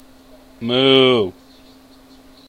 A realistic cow moo.
bull, cow, cattle, mooing